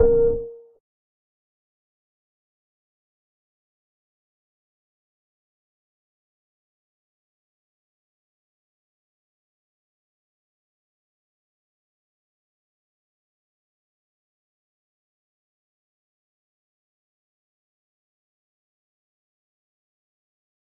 Tarea incompleta
notification, incomplete, task